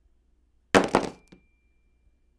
Hunk of Metal Drop
A large hunk of metal being dropped